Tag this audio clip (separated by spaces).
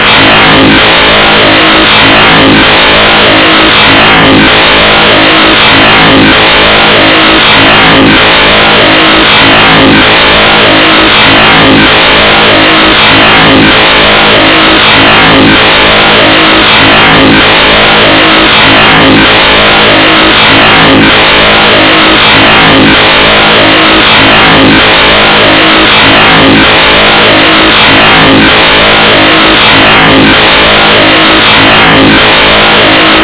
ambient audacity weird